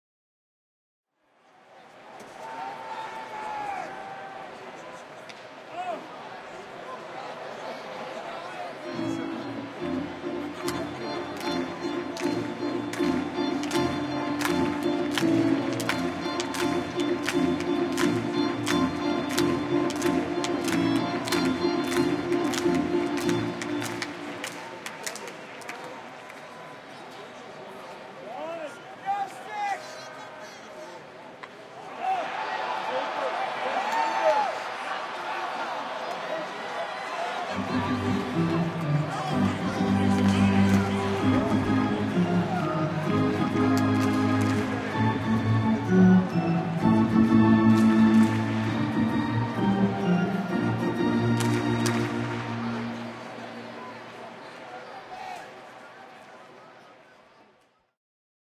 WALLA Ballpark Organ Music Various
This was recorded at the Rangers Ballpark in Arlington on the ZOOM H2. Two random tunes of organ music playing at the stadium. Can anyone name them? :D
ballpark, baseball, crowd, field-recording, music, organ, sports, walla